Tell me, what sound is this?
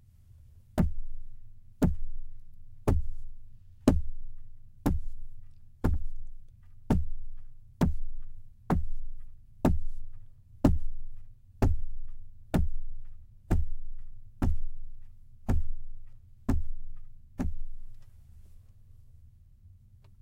A compilation of 18 bumps (e.g. bangs, footsteps, hits, etc...)
The sound effect was recorded at ideaMILL at the Millennium Library on October 25th, 2019.
banging, bump, foot, footsteps, hit, step, thump